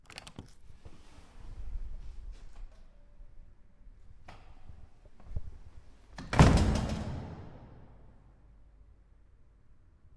door closing into stairway
sound of a door opening and closing into a stairwell.